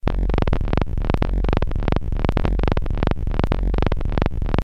click of a needle on an old record (different)
click, detritus, field-recording, glitch, hiss, noise, turntable, vinyl